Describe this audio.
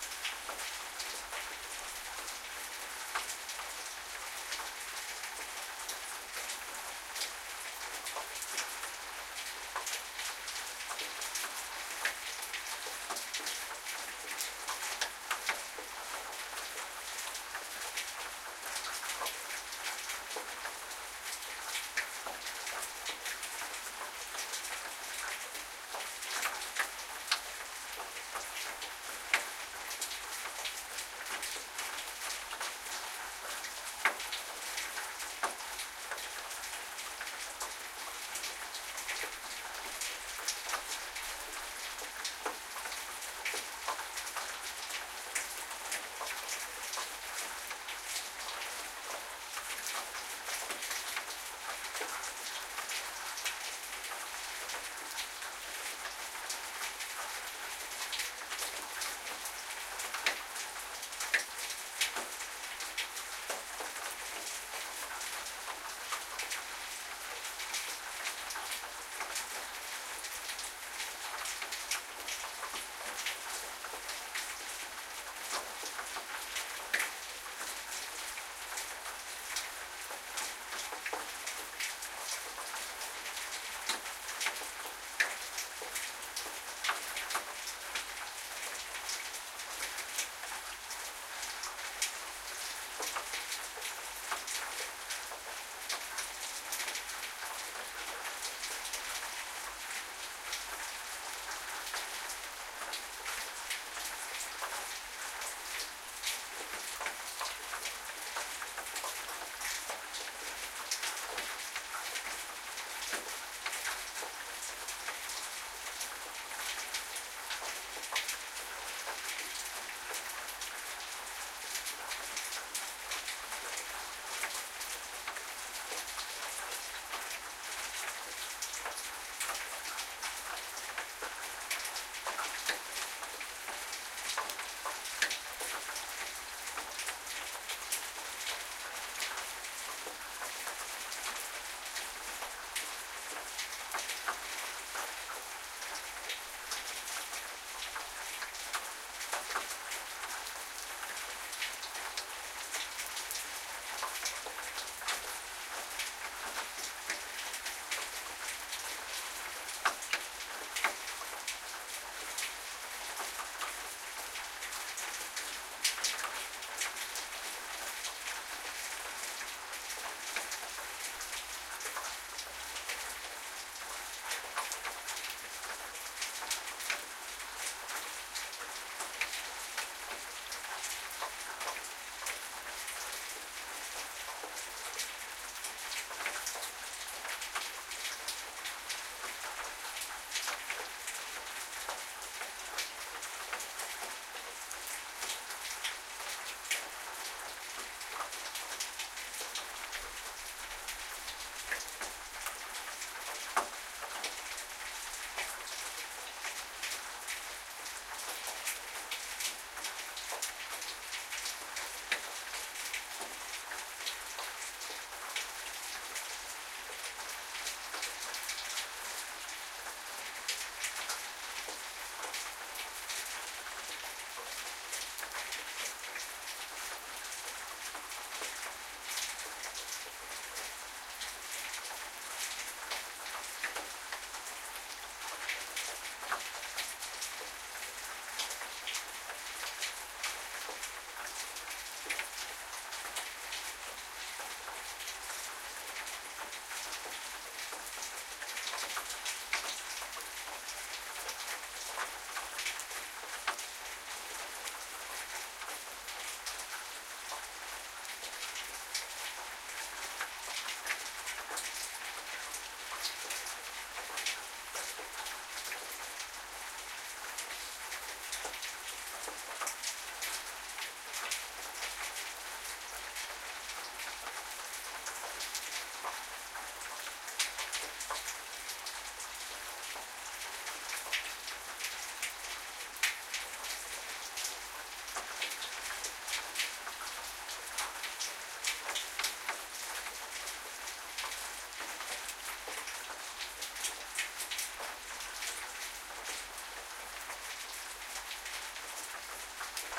City rain 001 [3am]
City rain recorded at 3am